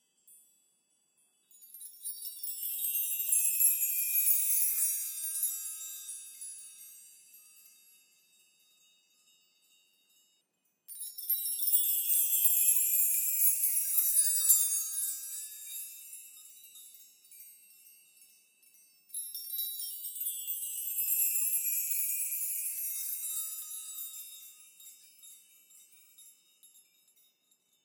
Close-mic of a chime bar made from various size house keys, strumming from high to low pitch. This was recorded with high quality gear.
Schoeps CMC6/Mk4 > Langevin Dual Vocal Combo > Digi 003